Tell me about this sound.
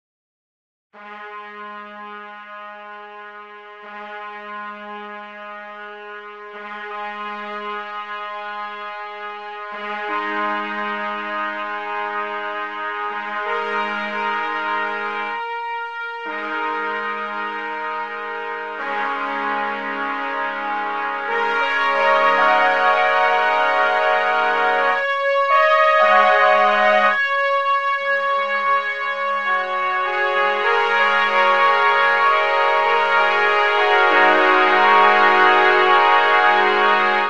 F Sharp Pentatonic Improv 03
Trumpet fanfare in F sharp pentatonic
brass, f, heroic, entering, intro, sharp, Trumpets, ceremonial, theme-song, epic, fanfare, horn, royal, announcing, pentatonic